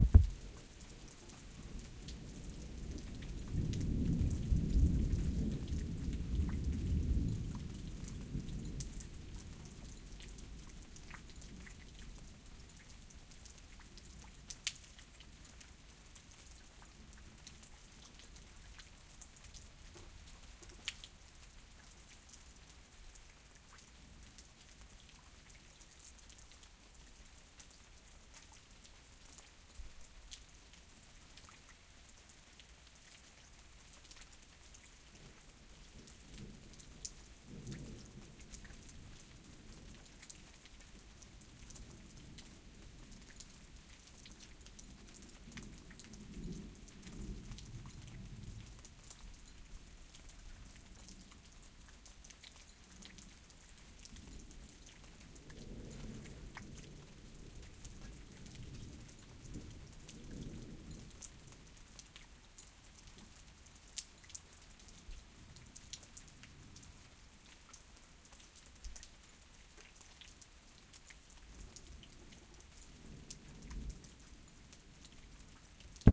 rain and distant thunder 3
A late afternoon thunderstorm passing by
rain, distant